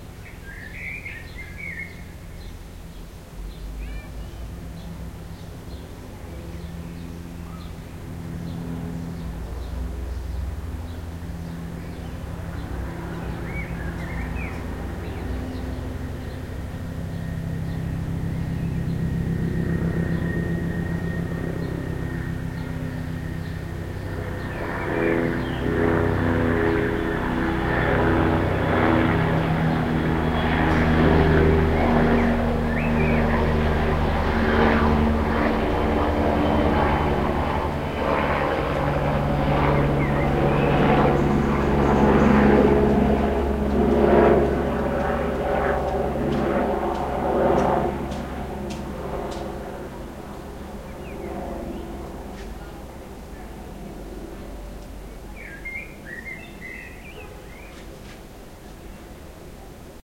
A blackbird sings and a helicopter passes around five o'clock p.m. on the sixteenth of June 2007 in Amsterdam. Recorded with an Edirol cs-15 mic plugged into an Edirool R09,